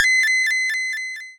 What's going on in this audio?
coin chime
A system sound for when the game notices a person inserting a coin into machine. Created using BFXR
8-bit, arcade, bfxr, chip, chippy, game, retro, sfxr, square, tone, video-game, wave